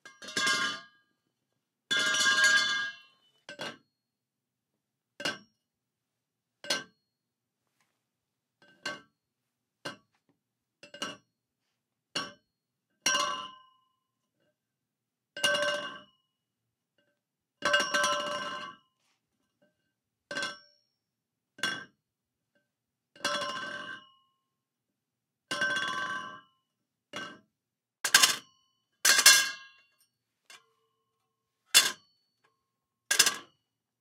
Metal pole, dropping, set down, metal ring
Dropping a heavy metal pole on solid wood floor
Metal, down, drop, hits, pipe, pole, ring, ringing